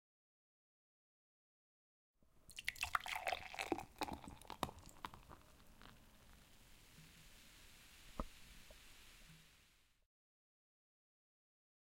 pouring beer in to the glass 2
Pouring beer into the glass. Take two.